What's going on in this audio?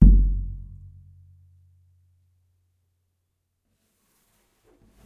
Nagra ARES BB+ & 2 Schoeps CMC 5U 2011.
bass drum hit on the hand
bass, drum, hand